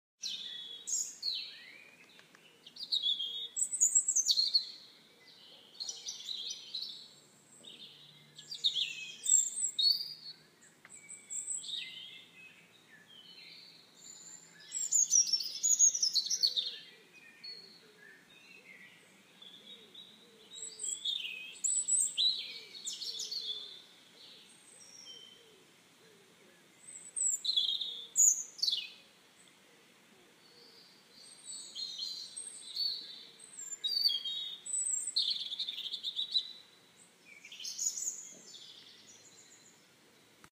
birdsong, spring,English countryside
Spring birdsong, nature reserve, Hampton Lode Worcestershire UK.